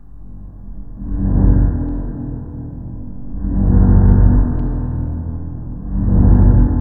BOLO Julie 2014 2015 klaxon
This recorded sound have been taken from a horn of a car. I modified it on Audicity in order to give more deepness to the sound. Now it sounds more like a boat horn.
What I modified :
Speed reduction : -77
Reverse direction
Reverberation
deepness, horn